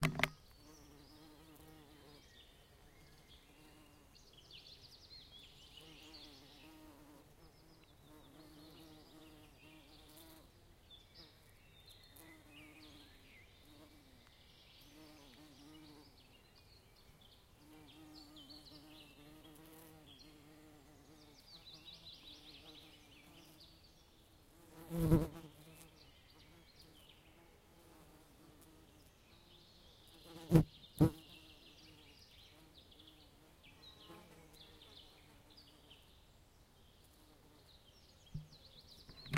Insects in forest making noises
insects making noises when flying to flowers and doing insect things
bee, bees, bug, buzz, buzzing, field-recording, fly, flying, forest, insect, insects, nature, swarm, wasp